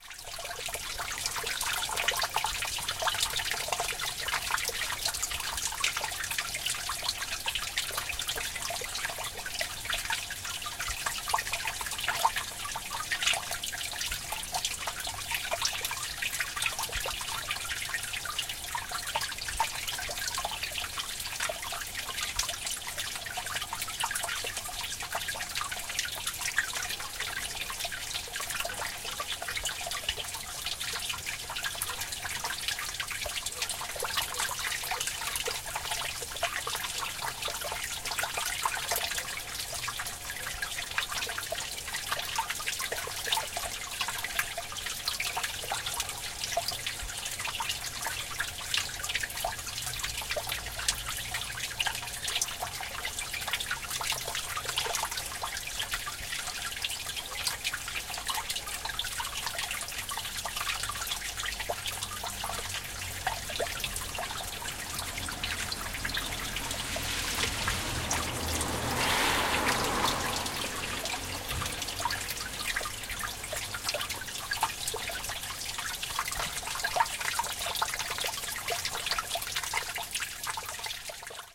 catch-basin grate 231211
23.12.2011: about 2 p.m. sound of meltwater flowing in the catch-basin grate. recorded from the ground level. Karkonoska street in Sobieszow (south-west Poland). recorder: zoom h4n; fade in/out only
water, catch-basin-grate, watercourse, drops, fieldrecording